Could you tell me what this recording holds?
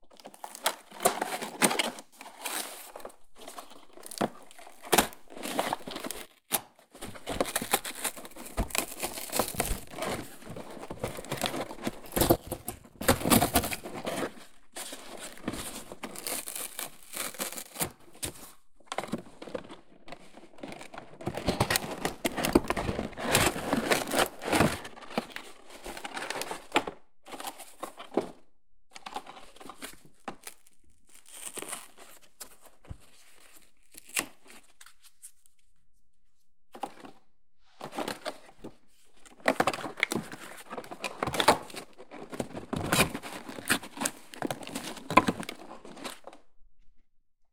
cardboardbox tearing
Tearing, stretching, wrapping carboard boxes
box, foley, paper, rustle, scratch, sounddesign